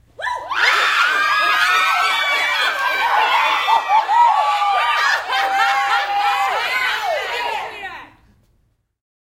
A group of women screaming wildly. One of several similar recordings I made for a show in Dallas. Recorded with an ECM-99 to a SonyMD.
crowd, field-recording, human, women